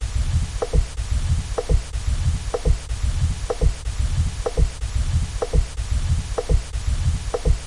LUTTRINGER Léa 2017 2018 Twist
Its sound of disfunctions screen, I multiply to creat a rythm. I realized a sinusoid at 435 hz to produce a percution with filter.
Codes Schaeffer : X / N'' / X''/ V''
Morphologie :
1.Masse:Groupe tonique groupe nodal
2. Timbre harmonique : terne, grésillant
3. Grain : microstructure
4. Allure: Vibrato, chevrotement
5. Dynamique : impulsion
6. Profil mélodique : scalaire ou serpentine
7. Profil de masse: hauteur parmi d’autres
bit, backgroundsound, ambience, bug, soundscape, music, electronic, synth, sound, rytm